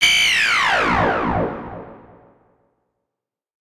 A scifi raygun from an earlier time.
Inspired by BBC radiophonic workshop effects - this was generated by SoundForge FM synthesis and assorted effects.
electronic, raygun, soundeffect, synth